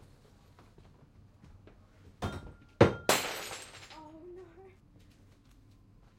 Empty glass brokes with young lady exclamation
A glass falls down in a pub close to the Waterloo Station, London. A young girl react.
- PSC M4 MKII
- Tascam DR40
No post production done.
beer, broken, exclamation, glass